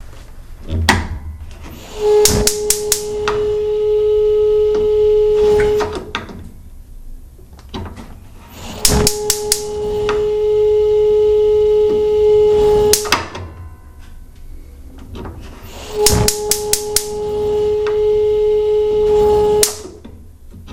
light stove
the stove in my kitchen is really loud when it runs...
light, loud